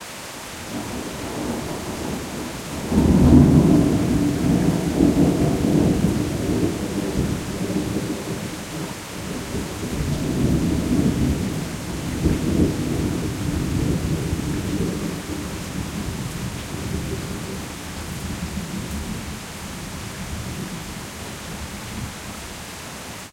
Distant Thunder with Rain (1)
Shorter, and first shot of rolling thunder, shot during an early-morning thunderstorm in North Carolina. Includes the distant rumble, and rain. Shot on a Sony A7Riii, edited and EQed in Ableton Live.
field-recording, lightning, nature, rain, raining, rolling-thunder, rumble, shower, storm, thunder, thunder-storm, thunderstorm, weather